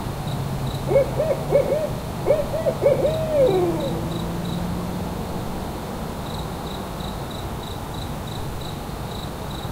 Crickets chirping at night, accompanied by an owl hooting.
Recorded Apr-22-2012 in Arkansas.
Are owls an evil omen?